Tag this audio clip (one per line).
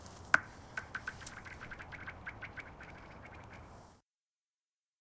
winter
field-recording
ice